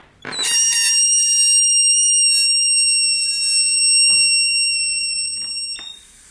degonflage aigu
various noises taken while having fun with balloons.
recorded with a sony MD, then re-recorded on my comp using ableton live and a m-audio usb quattro soundcard. then sliced in audacity.
air, indoor